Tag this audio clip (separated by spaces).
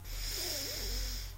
Human-Body Inhaling Breathing